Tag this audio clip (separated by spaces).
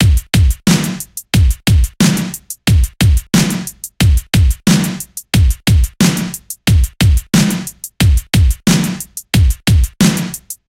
80s 90bpm 90s beastie beat boys cassette drum hiphop lo-fi lofi loop rap tape